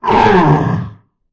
Pain Sound in Mask
Masked man experiences pain.
Mask, Pain, Agony